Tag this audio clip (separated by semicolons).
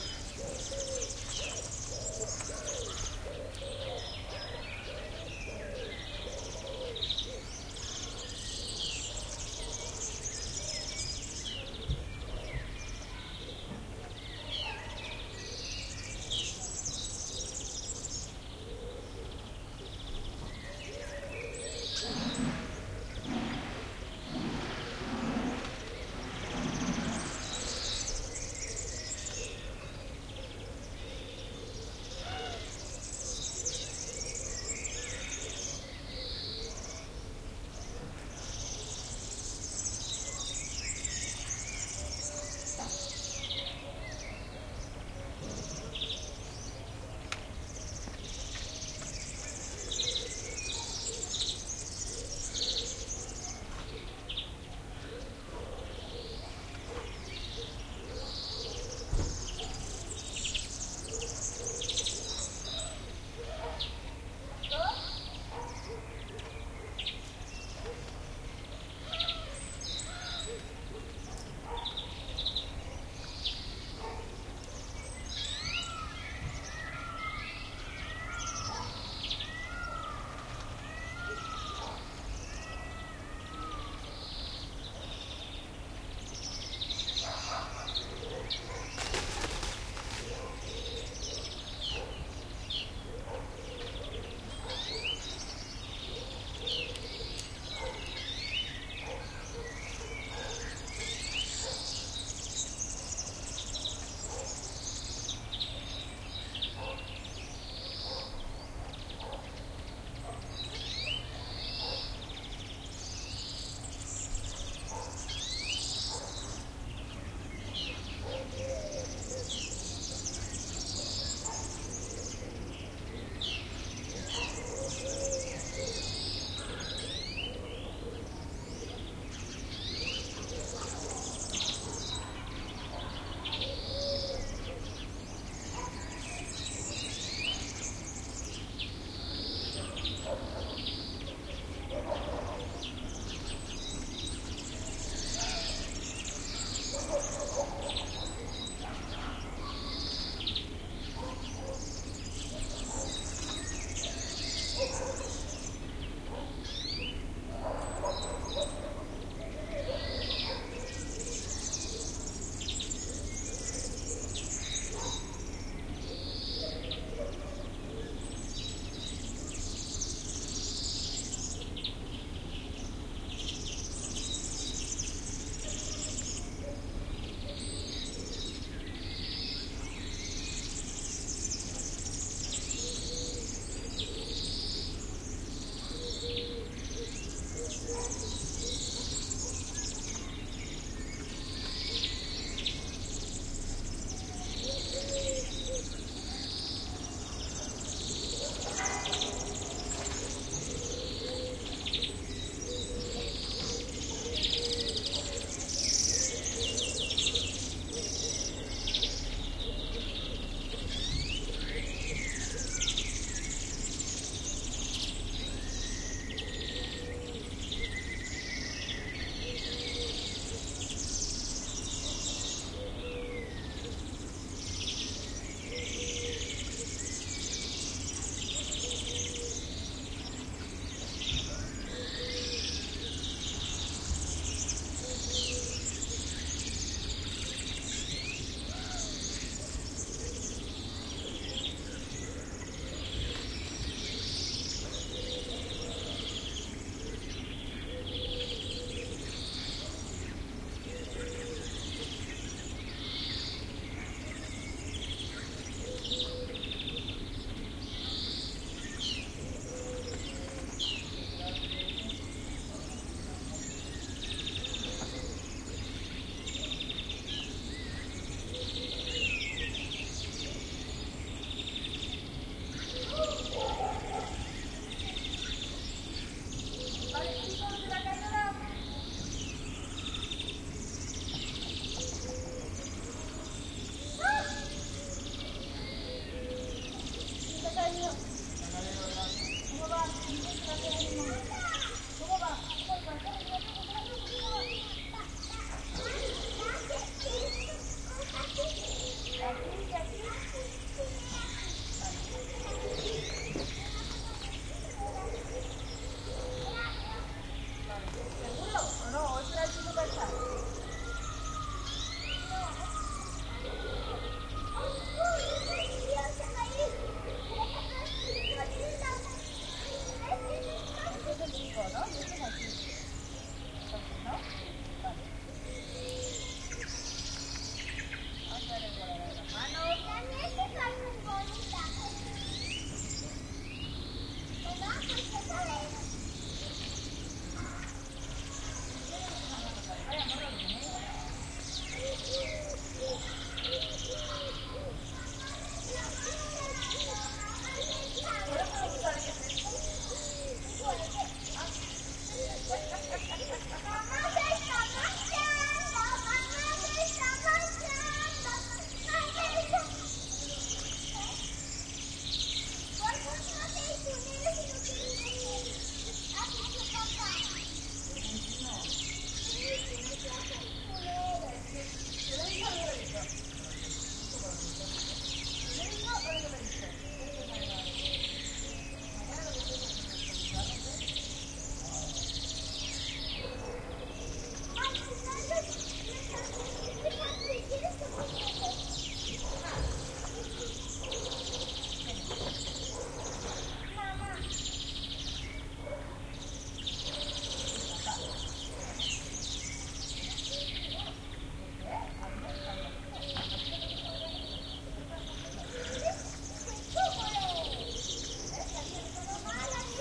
ambience; Ambisonic; Ambix; field-recording; garden; Harpex; second-order; Soundfield; soundscape; ST450